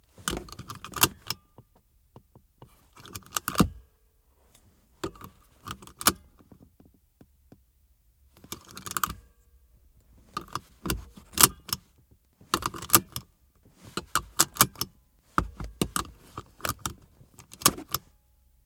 This ambient sound effect was recorded with high quality sound equipment and comes from a sound library called Audi A4 Allroad 2.0 TFSI which is pack of 155 high quality audio files with a total length of 213 minutes. In this library you'll find various engine sounds recorded onboard and from exterior perspectives, along with foley and other sound effects.